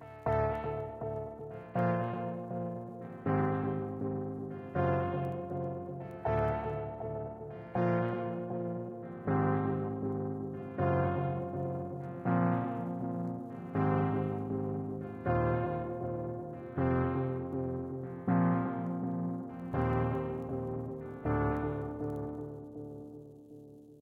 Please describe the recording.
Korg Minilogue with vinyl distortion and grain delay
boc, melancholy, synth, boards, rythmic, loop, korg, analog